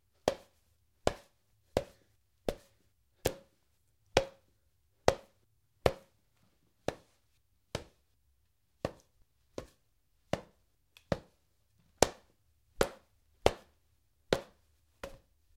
Close Combat Baseball Bat Head Hits Multiple
Multiple human head hits with a baseball bat.
baseball, bat, combat, fight, fighting, foley, fx, hit, sfx, sound, soundeffects, soundfx, studio